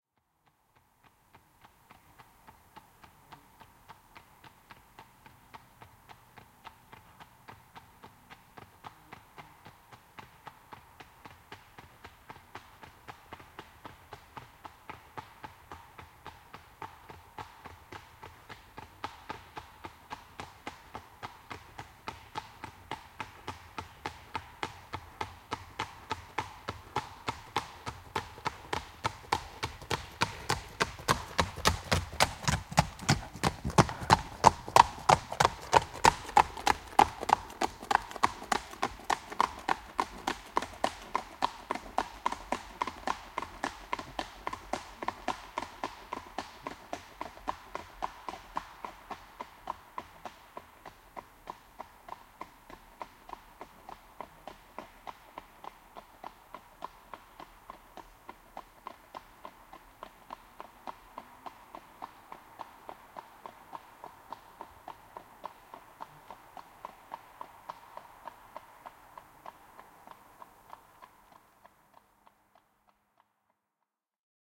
Hevonen ohi, kaviot / Horse passing by at a trot on asphalt, hooves clattering
Hevonen ravaa ohi asfaltilla, kavioiden kopsetta.
Paikka/Place: Suomi / Finland / Kitee, Sarvisaari
Aika/Date: 12.07.1982